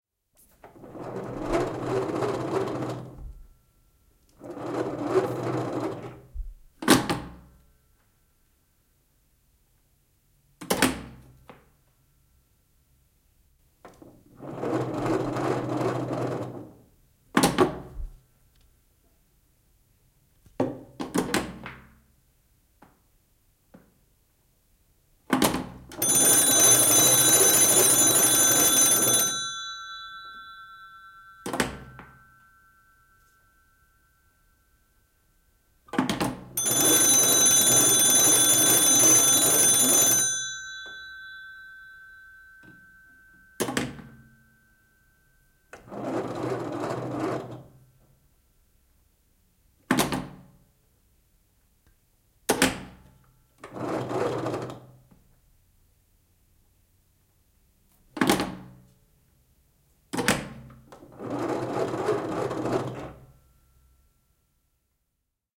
Vanha, puinen seinäpuhelin. Puhelimen kampea, veiviä kierretään, luuri ylös ja alas. Välillä pelkkä kiertoääni, välillä myös pirinää. Erilaisia. (L.M. Ericsson & Co. Trade-Mark, Stockholm).
Paikka/Place: Suomi / Finland / Helsinki, Lauttasaari
Aika/Date: 31.08.1983
Suomi, Soundfx, Receiver, Luuri, Field-Recording, Landline-telephone, Veivi, Phone, Crank, Tehosteet, Finland, Veivipuhelin, Dial, Kampi, Ring, Finnish-Broadcasting-Company, Handle, Yle, Yleisradio, Puhelin, Telephone